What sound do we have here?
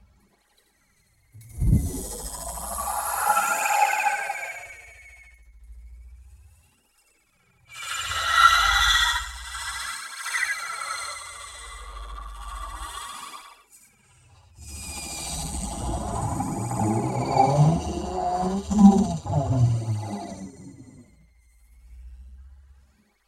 It is only fitting that it should be an "alien" sound.This sample was created in Ableton Live 7 using my own voice put through a series of VST plug-ins. I cannot be precise on the exact plug-ins used and their order or settings (sorry, did not make a note at the time!). But what I remember was that the sample was created during a session when I was using a plug-in setup comprising a vocoder, phaser and delay effect. I used a web mic to input sound into the computer running through the plug-ins with the sound being output through my Hi-Fi speakers to allow for a slight amount of feedback.I had to tweak the volume and mic placement to allow for a controlled amount of feedback through the system, but once that was done amazing sound effects where produced! Judge by yourself.Warning: this sound is REALLY creepy.
sci-fi, feedback, experimental, special-effects, alien, vocoder, fx, creepy, processed-voice, phaser, horror, scary